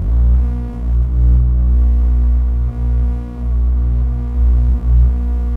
Granular drone
Sampled didge note (recorded with akg c1000s) processed in a custom granular engine in reaktor 4